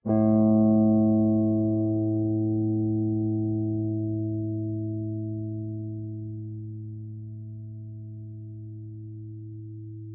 Recording of an open A-string of a Fender Stratocaster. Processed to remove noise.
a-string, clean, electric-guitar, fender-stratocaster, guitar